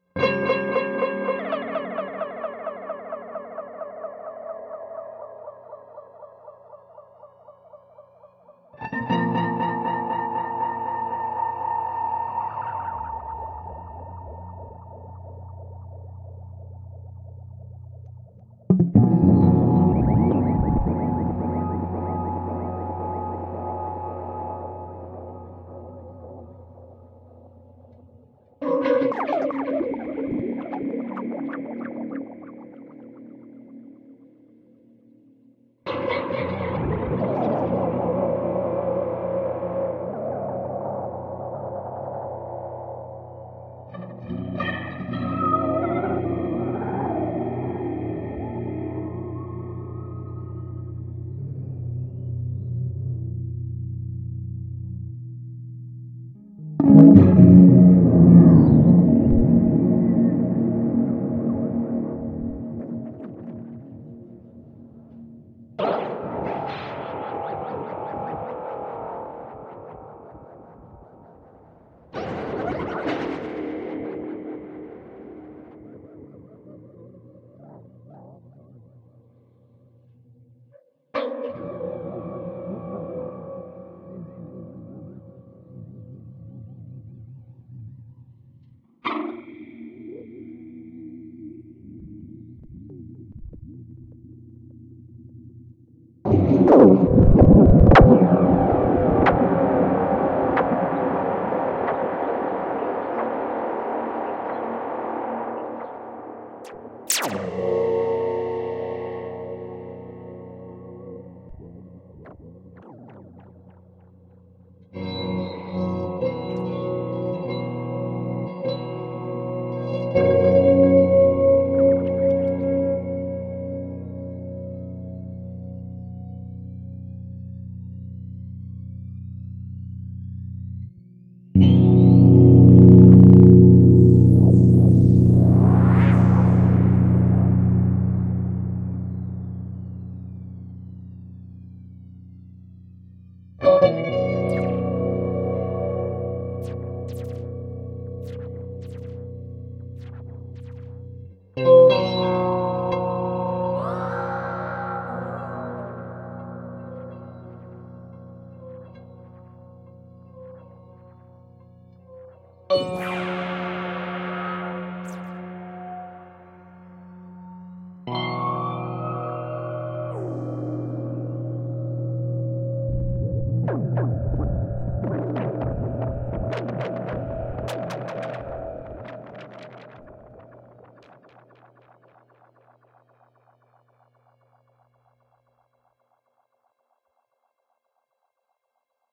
Abstract Guitar SFX 003